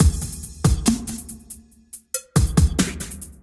70 bpm drum loop made with Hydrogen
beat, electronic